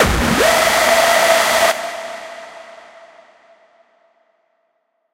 Dubstep Growl like Skrillex !
Genre: Dubstep Bass
Made with NI Massive